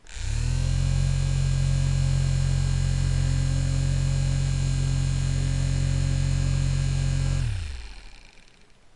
A Mini-Fan motor pushed against a mic.
Recorded and Processed with Audacity
slow, Hum, engine, rotor, vibration, Fan, generator, motor, mechanical, helicopter, Power, bass, start, Compressor, machinery, machine